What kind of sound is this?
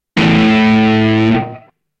heavily distorted electric guitar